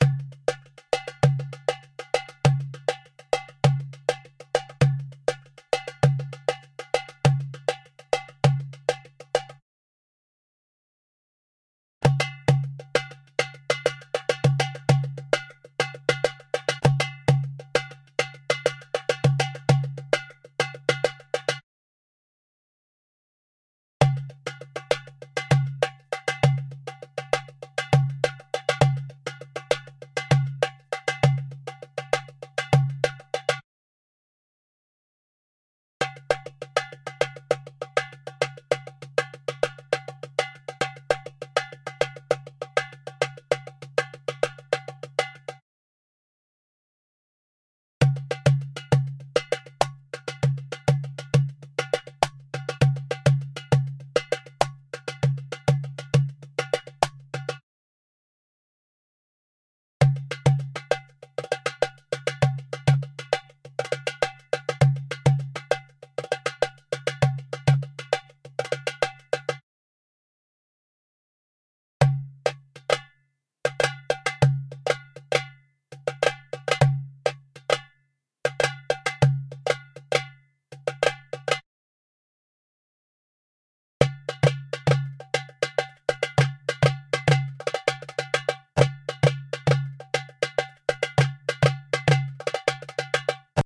darbuka loops pack